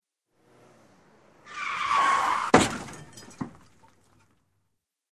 Slow down brake crash
break, car, crash, fast, handbrake